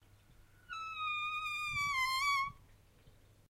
creaky door 5
A creaking wooden door (with metal hinges.) This sound is a fairly short by drawn-out high-pitched creak. Recorded in stereo using a H2n.
closing, Creak, Creaking, Creaky, Door, High-pitched, Hinges, short, Spooky, Stereo, Wooden